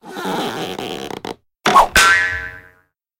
Foley sound effect made for theatre and film. Recorded with Akg 414 and mixed in Cubase.